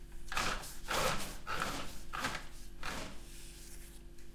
blind persiana field-recording
blind, field-recording, persiana